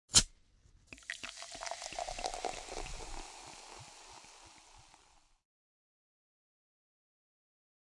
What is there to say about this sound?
open and pour
A beverage being opened and poured
beverage, open, cup, thirsty, bottle, drink, pour